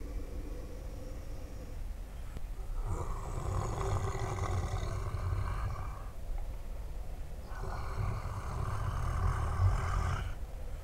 Soft Growl (Right)
Suspense, Orchestral, Thriller